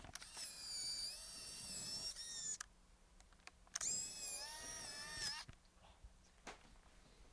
Camera film rewind
whir, buzz, latch